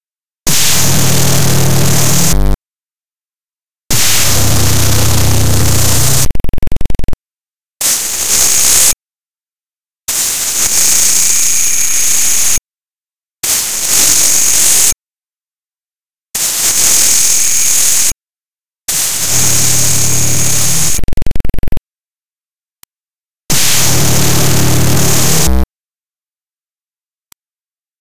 [LOUD!!!] Transmissions
CAUTION! THIS SOUND IS EXTREMELY LOUD !!!
A simulation of some radio interference. has some interesting harmonics and vibrating resonances.
amplified, distortion, drone, effect, Extreme, high, impact, interference, LOUD, noise, pa, powerful, radio, rough, sound, transmission